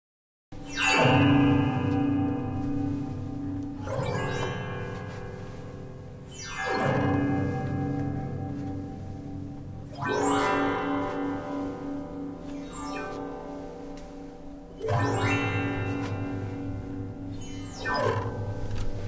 Symponium disc player played with a fingernail
Sweeping a fingernail along the metal comb of a 'symphonium' music box.
Shot handheld on a Sennheiser KE66 (so there is some clothing rustle).
– hello! You're under no obligation, but I'd love to hear where you've used it.
magic, magical, spell, sweeping, symphonium, transition, wand